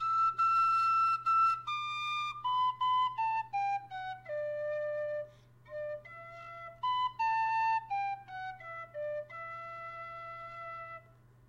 Excerpt from Scarbrough Fair played on a Feadóg tin whistle in key of D.
scarborough, tin, whistle, mono